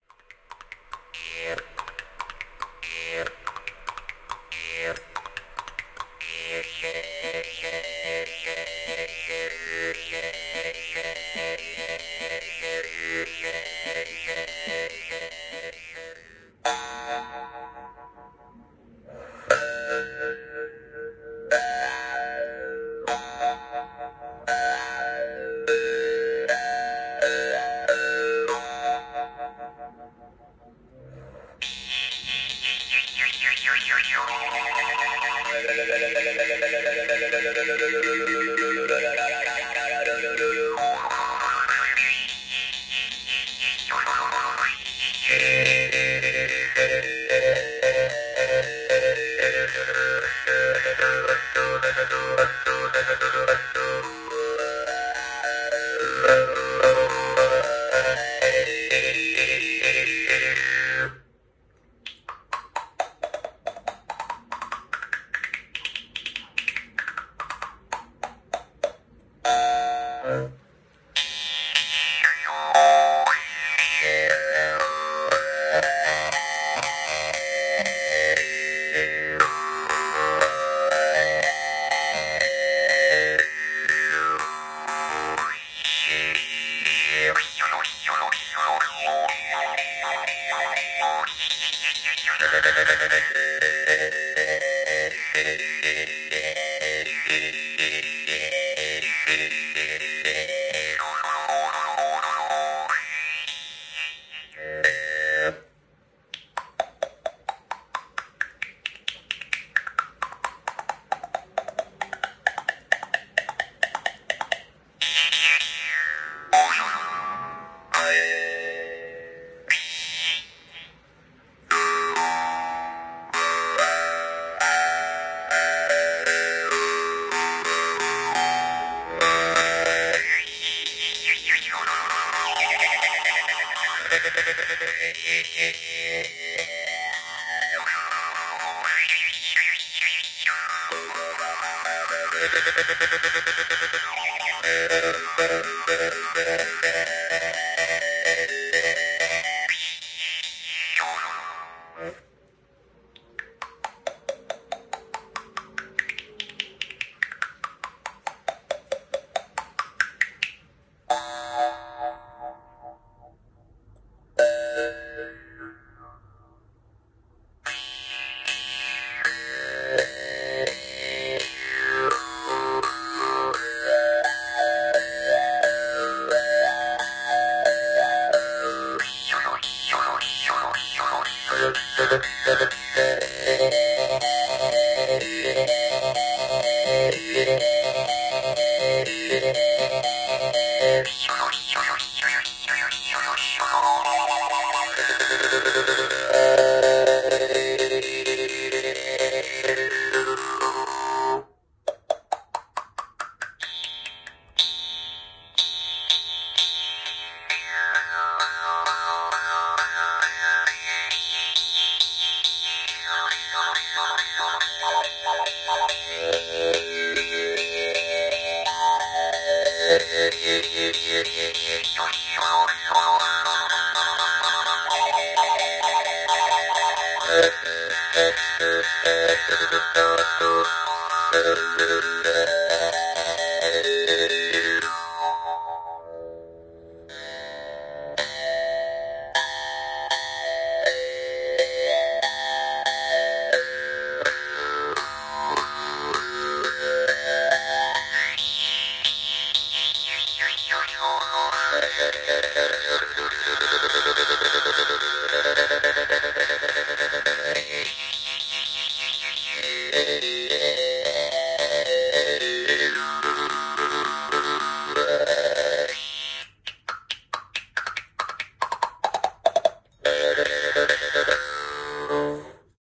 Jaw Harp
Mouth, Harp, boing, Jaw, twang, spring